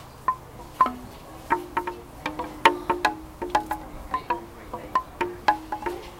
Short recording of wind chimes at a garden centre